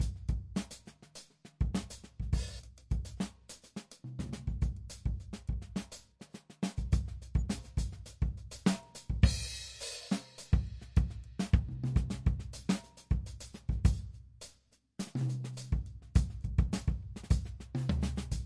sweet-groove 104bpm
104bpm, drum-loop, groovy, improvised, rhythm, sticks